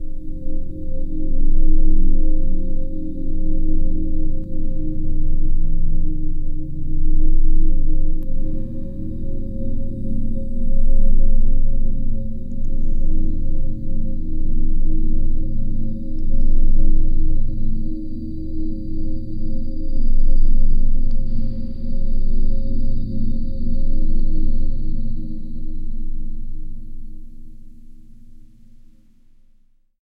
THE REAL VIRUS 06 - BELL DRONE - C0
Drone bell sound. Ambient landscape. All done on my Virus TI. Sequencing done within Cubase 5, audio editing within Wavelab 6.